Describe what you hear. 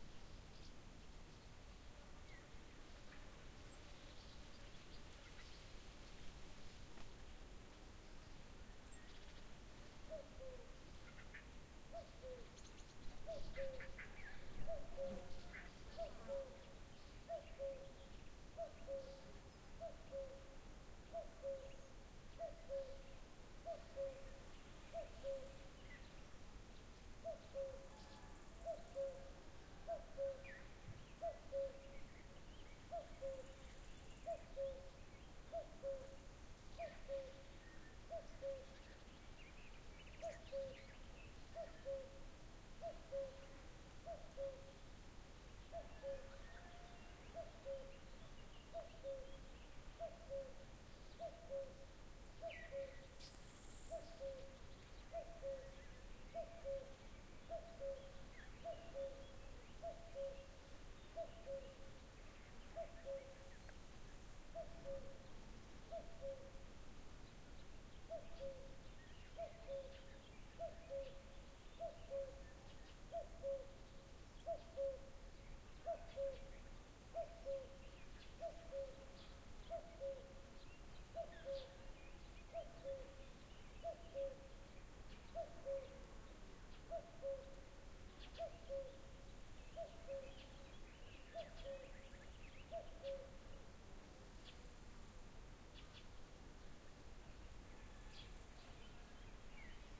Rural ambient sound with cuckoo and all the stuff. Recorded in North Czech with Zoom H6.
ambiance, countryside, farmland, Cuckoo, agriculture, farming-land, rural, chicken, country, nature, farming, landscape, agricultural, birds, insects, land